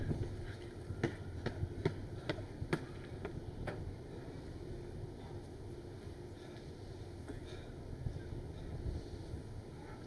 Running Stopping Panting
Guy running stops and pants trying to catch his breathe.
Panting, Running, Footsteps, Breathes